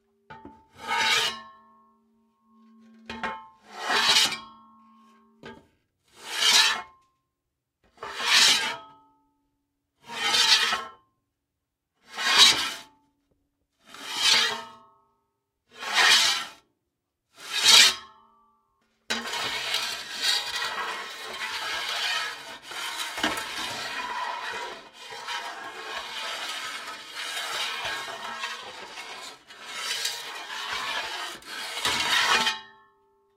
Scraping a metal dust pan on different objects. Mono recording from shotgun mic and solid state recorder.
metal, metal-scraping, pan, scraping